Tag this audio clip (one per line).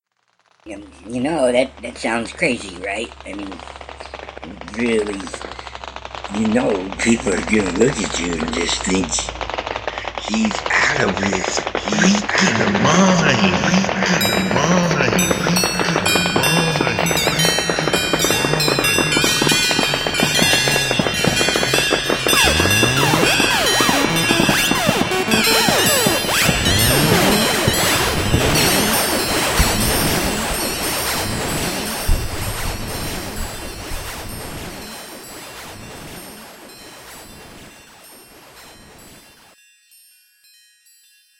strange
crazy
abstract
spoken
weird
Human
voice